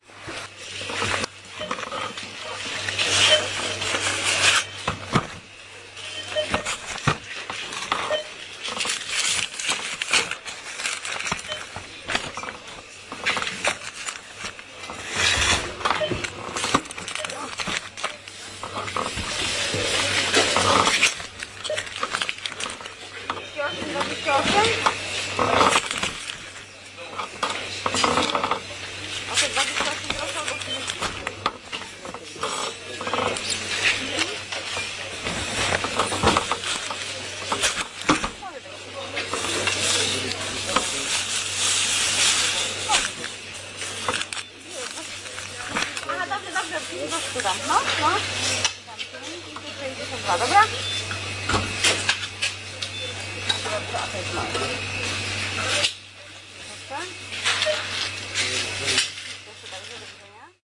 packing shopping 011010
01.10.10: about 21.00 in Piotr i Pawel supermarket in Stary Browar on Polwiejska street in Poznan. I am packing my shopping.
packing, poznan, people, poland, field-recording, cash-desk, supermarket, shopping, beeping, buying, hubbub, voices